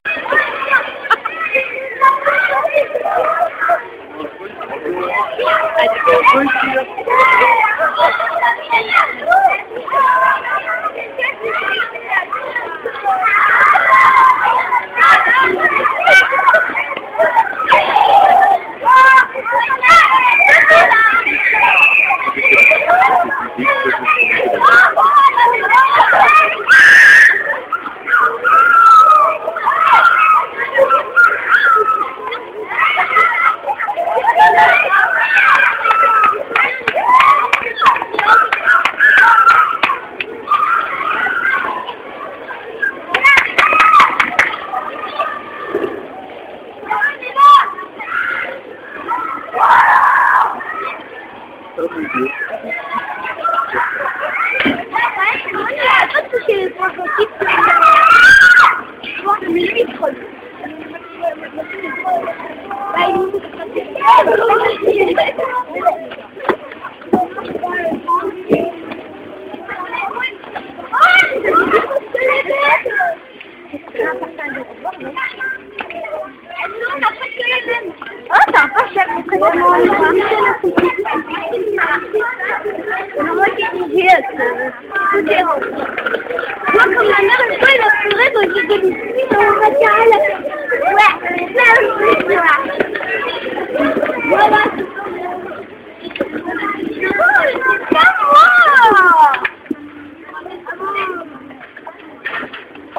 playground Saint-Guinoux
Schoolbell of Saint-Guinoux